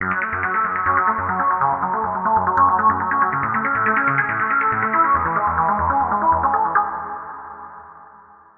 speed hi
Bass. (emphasis on the period)
This is the Higher octave one.
-Tempo: 140 bpm
-Progression: F#(2x) A E
-Awesome?: I think so.
bass, fast, rave, speedy, trance